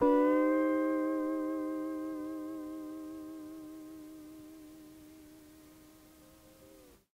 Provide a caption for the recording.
Tape Slide Guitar 17
Lo-fi tape samples at your disposal.
collab-2, guitar, Jordan-Mills, lo-fi, lofi, mojomills, slide, tape, vintage